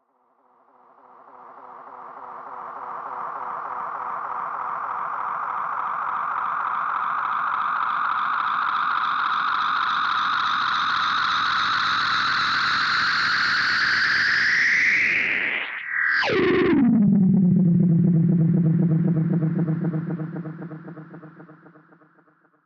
Sci-Fi Riser 1
Recorded my guitar amp. Used a analogue delay pedal to create the sound.
sci-fi, atmosphere, ambience, ufo, dark, riser